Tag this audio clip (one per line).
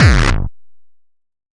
drum
gabber
gnp
hardcore
kick
powernoise
single-hit